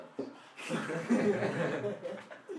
FX - risas 4